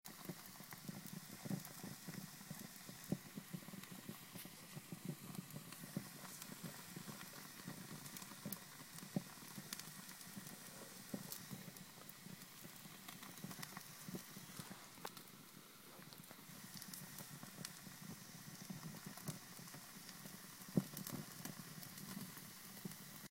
Small Fireplace. Fire just starts!
Recorded with a Sony PCM D50 and Edited with Pro Tools